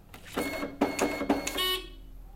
Ticket validation of a Barcelona bus. It's a staff card, so the sound isn't as the regular customers. But, the isolation it's good, bus wasn't running.